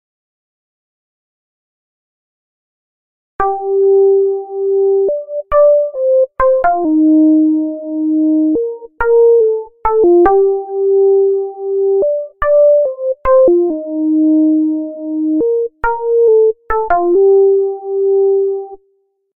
Just a simple synth with a nice melody.
Beat, No, Synth